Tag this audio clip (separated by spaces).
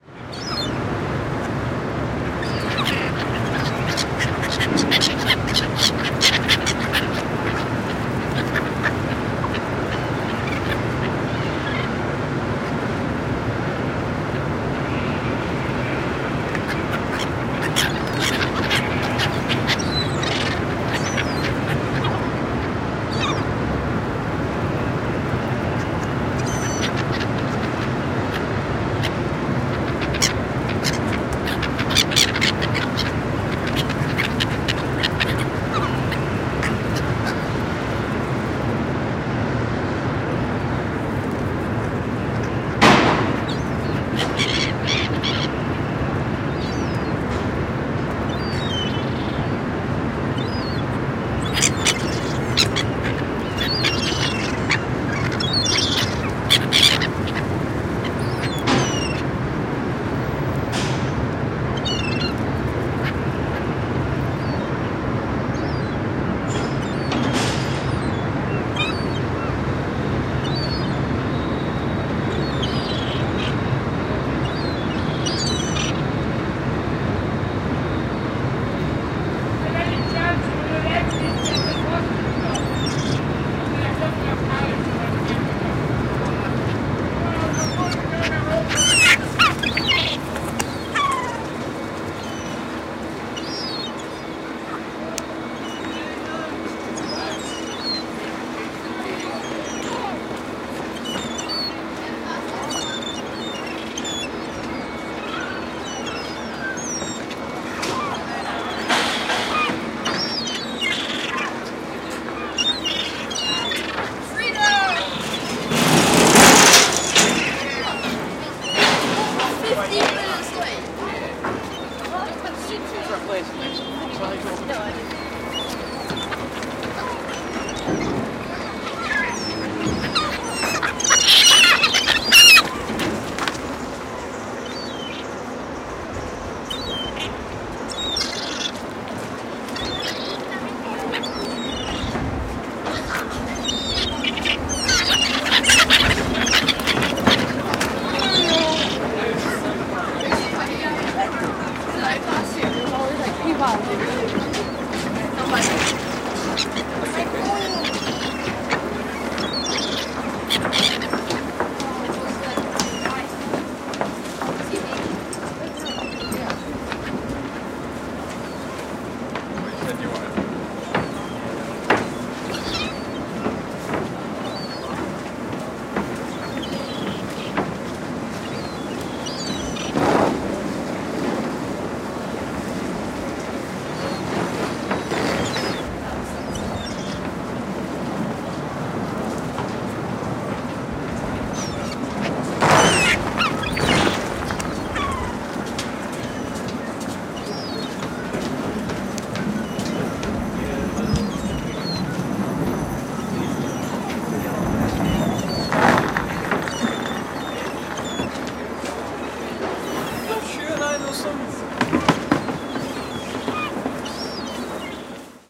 art birds chickens ferry field-recording knitting scotland seagulls